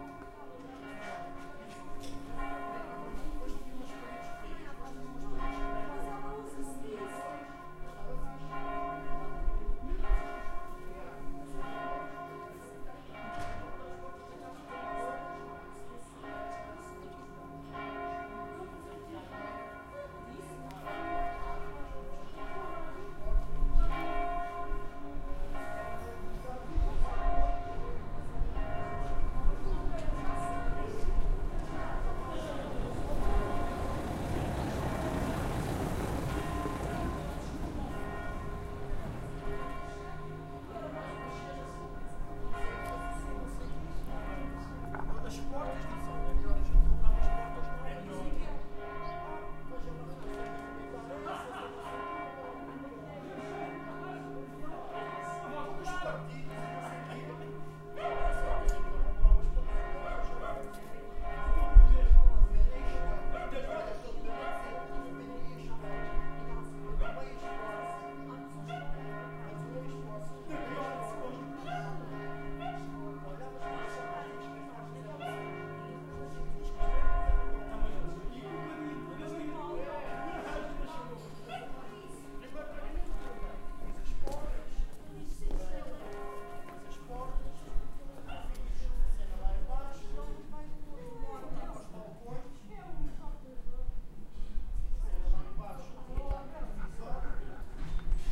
Here is one typical location of Lisbon: people are talking on the street and you can listen also evening church bell in the background and some traffic at the end.